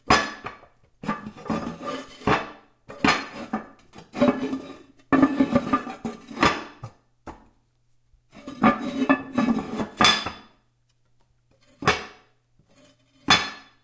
Toilet Water Tank Cover
Moving the toilet's water tank cover
drain, poop, restroom, washroom, water, WC